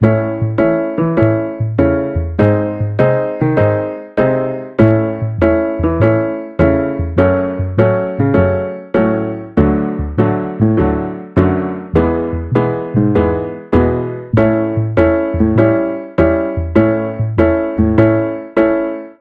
piano pop1 (Amajor-100bpm)
A simple chord progression over the Amajor scale.
Enjoy...
circus, drama, loop, loopable, phantom, piano, theme